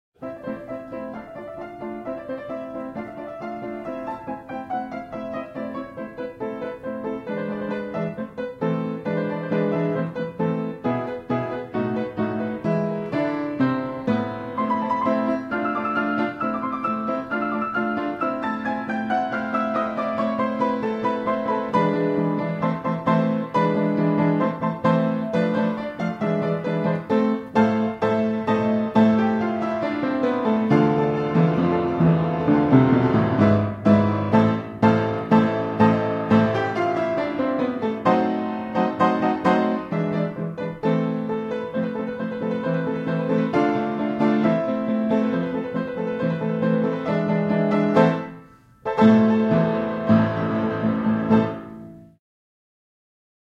Music from the Sam Fox Silent Movie Book. Played on a Hamilton Vertical - Recorded with a Sony ECM-99 stereo microphone to SonyMD (MZ-N707)